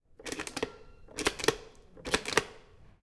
Sound of the hits of the button of a soap dispenser.
Sounds as something being cut but actually is the coming and going of the button of the soap dispenser.